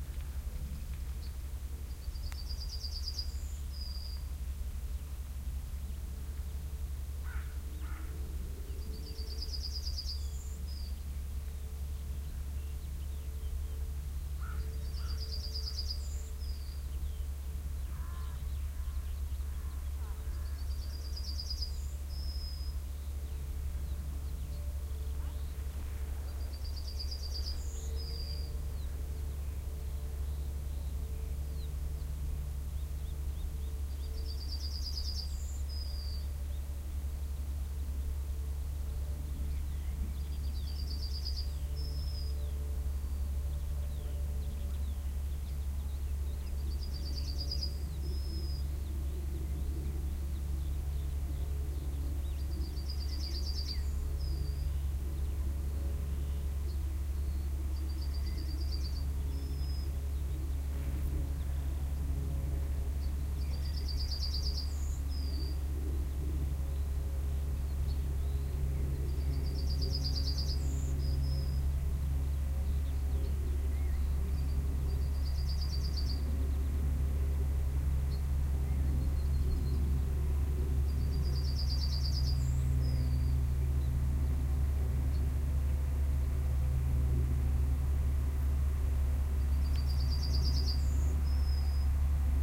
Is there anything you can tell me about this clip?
Rural bliss...standing on a field in March, next to some trees, some birdsong, people cycling by, pretty binaural. Soundman OKM with A 3 adapter and the iriver ihp-120.